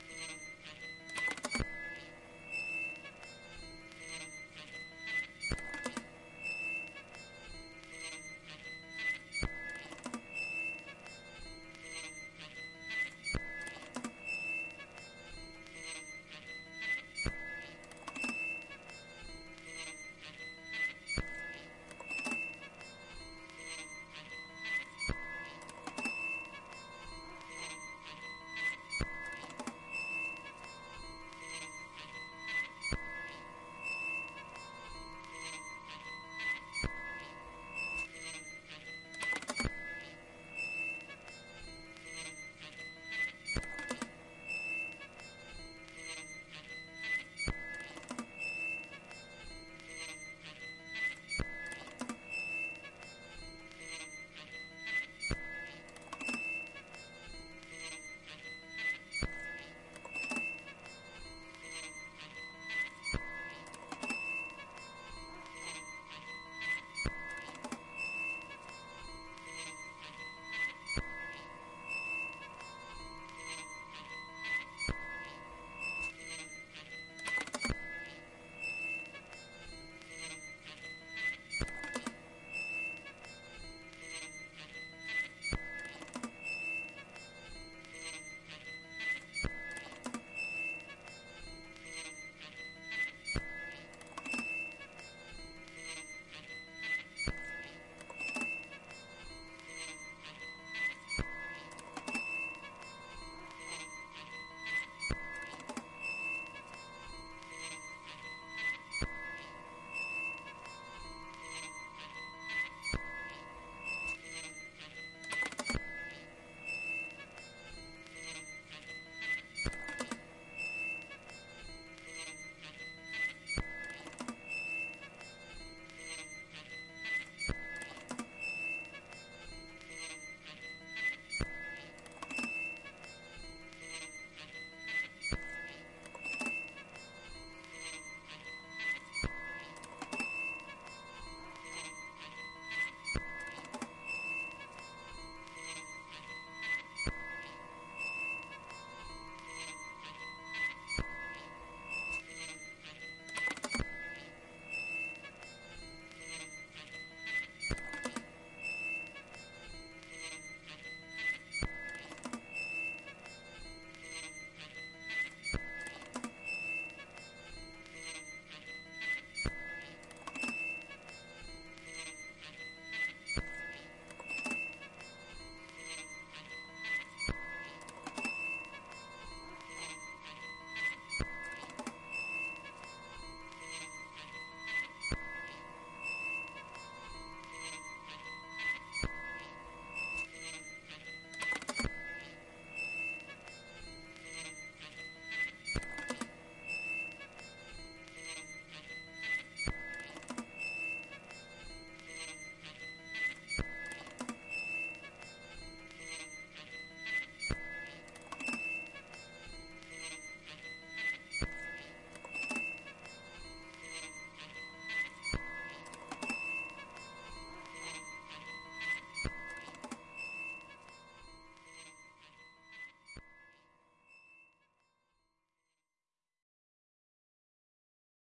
squeaking door loop
loop made from recording of a squeaking door and fingering a soprano sax
loop, soprano-sax, squeak